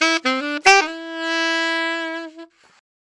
DM 150 AMin SAX RIFF 4
DuB HiM Jungle onedrop rasta Rasta reggae Reggae roots Roots
Jungle, onedrop